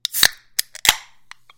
Open Soda can
Soda, Drink, can, open